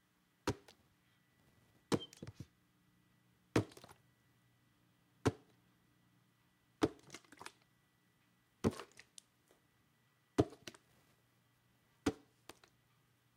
Waterbottle, grab, squeeze
Grabbing a waterbottle
grab, snatch, squeeze, waterbottle